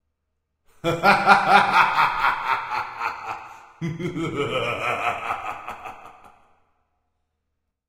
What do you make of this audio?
Varying Maniacal Laughter